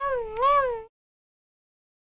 Cute creature 01
cute, voice